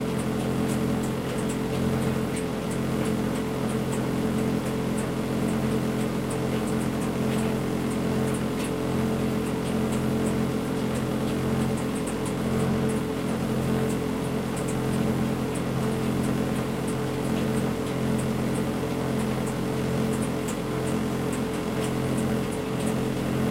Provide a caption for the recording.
Recording of a tumble dryer in a small room. Recorded with a Zoom H4N recorder, cleaned up in Audacity (44.1 16 bit).
CC 0 so do as you want!
dryer, home, hum, tumble, tumble-drier